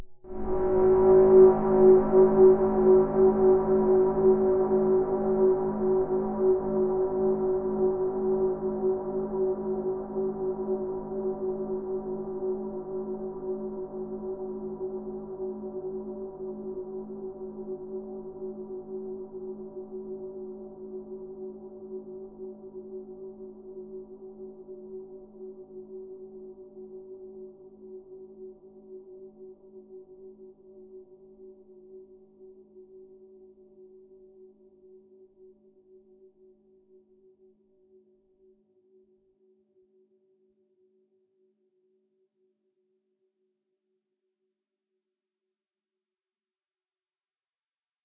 Scary Horn sound fx.
bogey, creepy, drama, dramatic, fear, film, fx, haunted, horn, Horror, movie, scary, sinister, sound-design, spooky, terrifying, terror, thrill